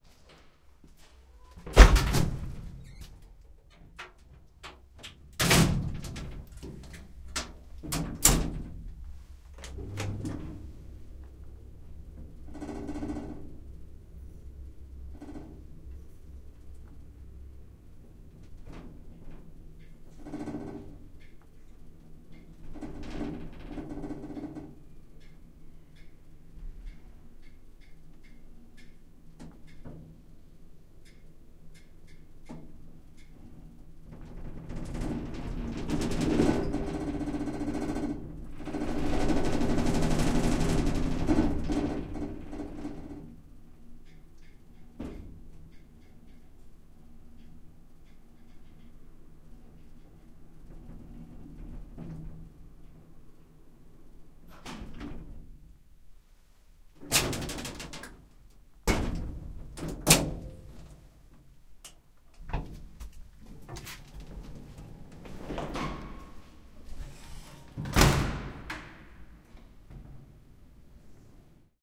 An older elevator: getting in, closing doors, picking the longest route, using the shaky elevator, getting out, closing it again.
German Retro Elevator (Westberlin)
Recorded with a Zoom H2. Edited with Audacity.